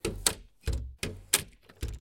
Lock Unlock - Impact Machine UI
close, door, machine, metal, open, ui, unlock